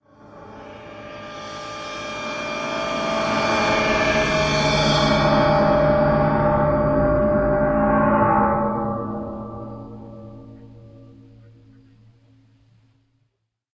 cymbal resonances
cymbal orocessed samples remix
transformation, percussion, cymbal